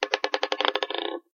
Plastic Ball 8
A plastic ball dropped on my wooden desk. Seemed like somewhat useful samples.